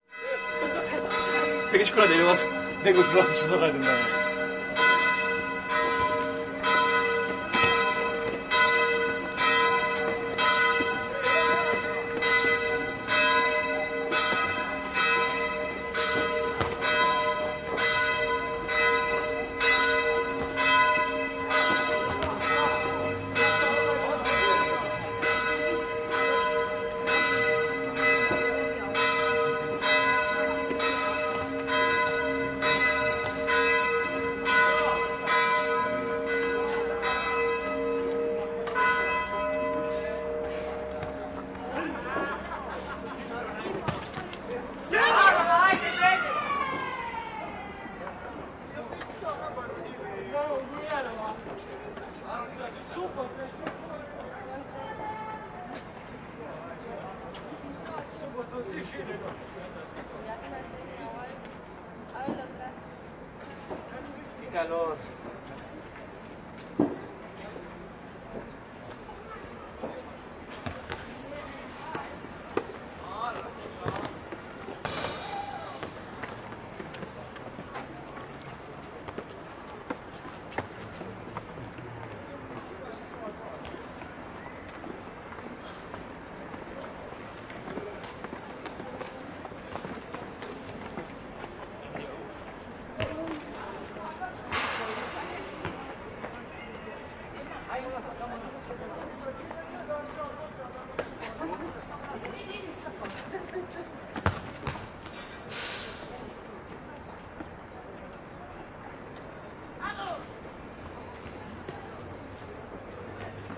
old grad playfield dubrovnik 07052016
07.05.2016: recorded between 17.00 and 19.00. On Walls of Dubrovnik (Old Grad) in Croatia. Church bells and ambience of the playfield. No processing (recorder martantz pmd620mkii + shure vp88).
mach, fieldrecording, Old-Grad, Croatia, bells, Dubrovnik, playground, city-walls, ambience